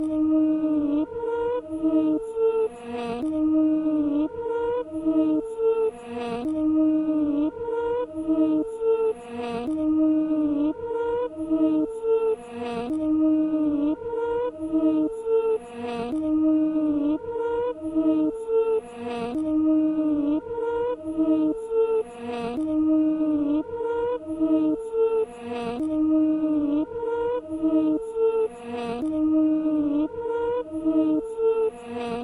creepy reverse loop
i recorded my voice and added some reverb to it then reversed it for a chilling effect
creepy, loop, reverse, voice, weird